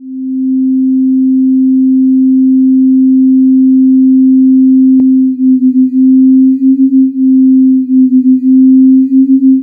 GUERARD Karl 2012 13 son1
//////// Made using Audacity (only):
Generate a C sound (frequency : 261,6 Hz, amplitude : 0.8 time: 10sec)
Apply cross fade in
Apply a phaser on the second part (5s to 10s) : 9 phases , 128 to 136, -20db to -24db
Amplification to delete saturation : -2db
//////// Typologie: Continu variÈ (V)
(début en tant que continue tonique (N) )
////// Morphologie:
- Masse: son seul
- timbre harmonique: riche, tournant et rythmé
- Grain: assez lisse avec une légère saturation des aigu sur la partie phaser
- Allure: stable au debut vibrante et tenu sur la fin, rythme régulier.
- Attaque: l'attaque est crescendo
C, Audacity, sound